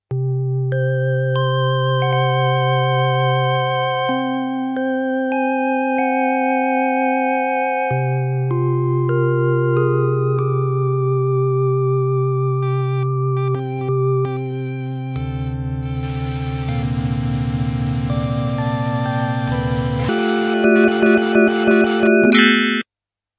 Yamaha PSS-370 - Sounds Row 4 - 05
Recordings of a Yamaha PSS-370 keyboard with built-in FM-synthesizer
Keyboard, FM-synthesizer, Yamaha, PSS-370